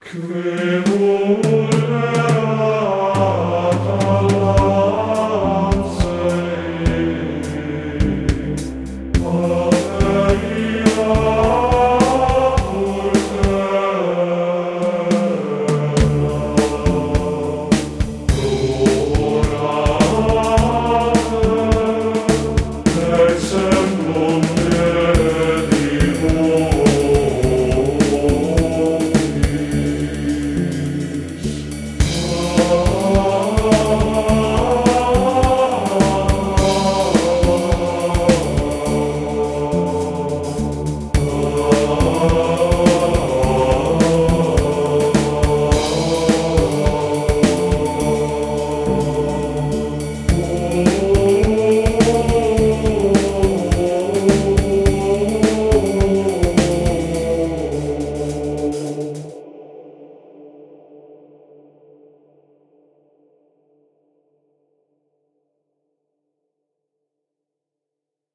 intriguing mix of Gregorian chant and rock.
Made and recorded with Garageband. Gregorian chanting = apple loops, edited with WavePad, all on a Mac Pro.